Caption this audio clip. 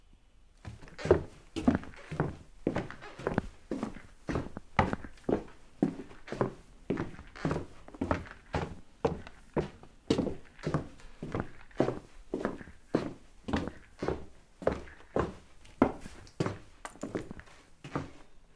Walking On A Wooden Floor
feet, floor, foot, footstep, footsteps, ground, shoes, step, stepping, steps, walk, walking, wood, wooden-floor